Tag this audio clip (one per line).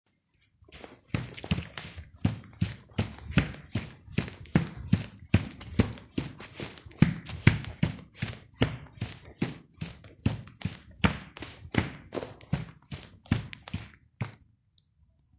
walking
walk
stomp
indoors
boots
shoes
carpet
floor
foley
running
footsteps
steps
run